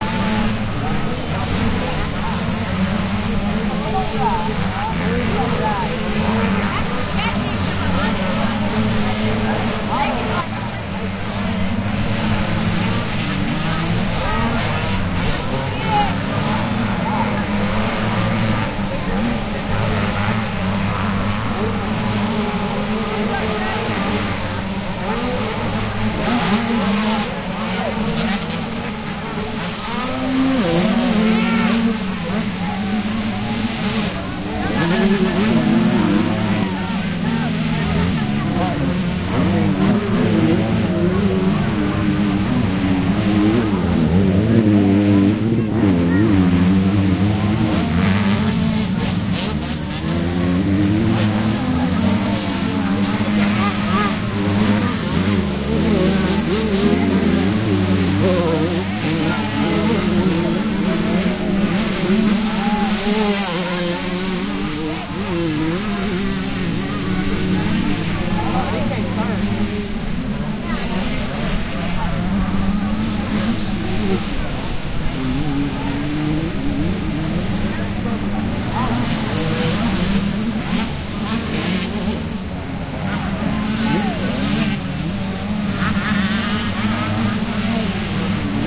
Motocross dirt bike motorcycle sound effects
This is a dirt track in Alabama (Monster Mountain, I think). I didn't realize my recorder kicked on as I was carrying it around in my pocket. The result was some really good audio of a dirt bike race, along with a little bit of small crowd sounds.